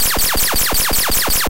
Laser zaps in rapid succession